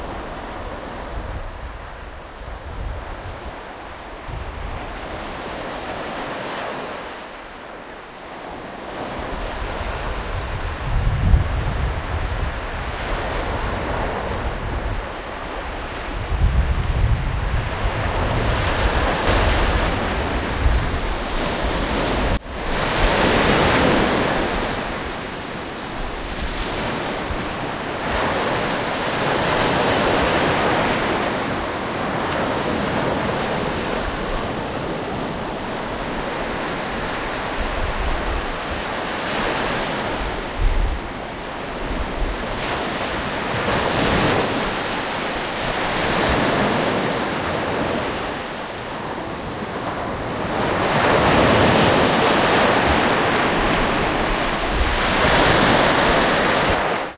Seaside Stereo 1
Raw recording of the seaside. Edited with Audacity.